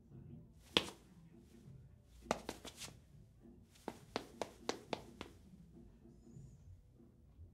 Pasos en piso de porcelanato
Pasos, Peque, Persona, os